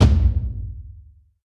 Deep Kick Cinematic
An attempt to recreate a dramatic film trailer sound. Request by moeeom123.